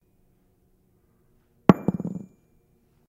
Key Drop 2
Sounds like "ping!"
folly, hit, metal